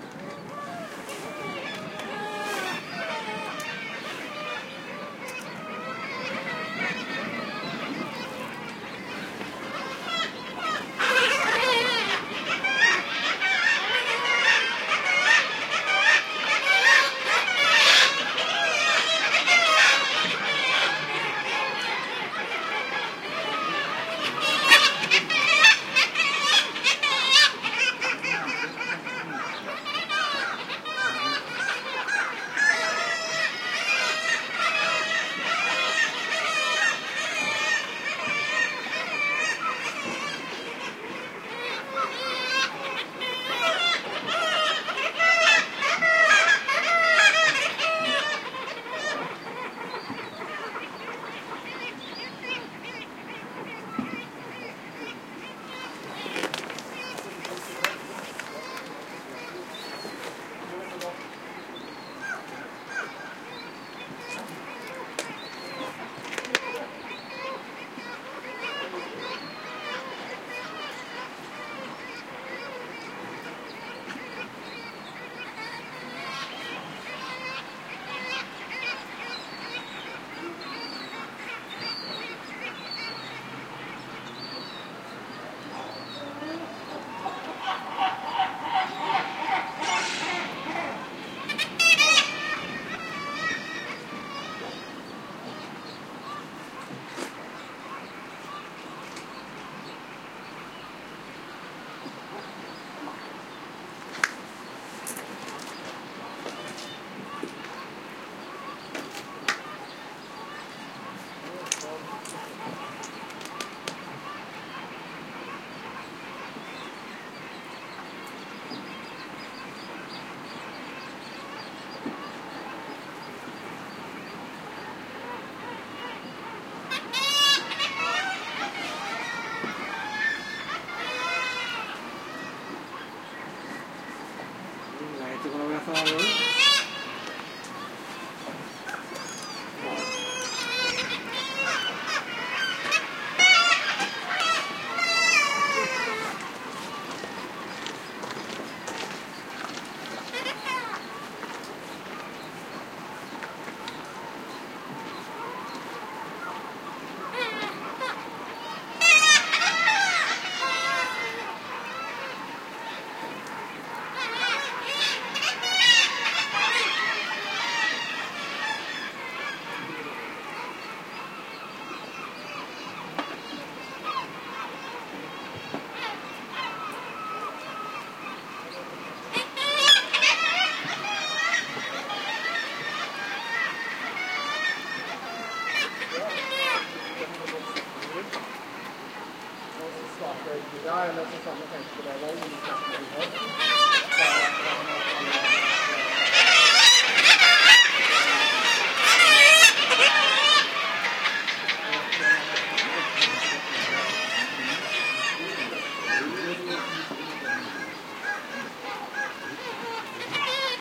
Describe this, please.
20150717 seagull.colony.close
Harsh, shrill cry of seagulls recorded at the docks of the village of A, Lofoten, Norway. Human voices can also be heard at times. Primo EM172 capsules inside widscreens, FEL Microphone Amplifier BMA2, PCM-M10 recorder
ocean, sea